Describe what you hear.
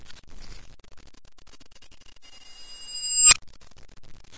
Reversed sound of a tinkling bell from a microwave machine. Recorded in an old tape, so recording may contain some impurities.